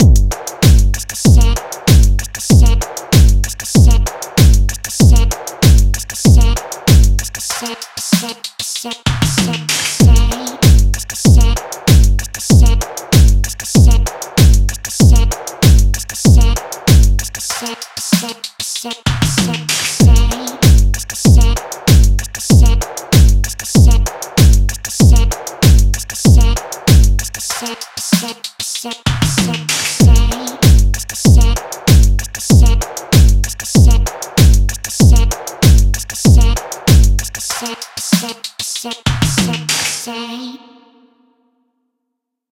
Spiryt Beat Loop No.2 96bpm
Electronic beats made from an original sample pack designed using the drum synth on NI Maschine. Processed with high-quality analogue emulation plugins and effects to give it extra punch and character. Enjoy!
Tempo 96 bpm. Beat 2 of 4.
All individual samples can be found in the Spiryt Beats Kit pack.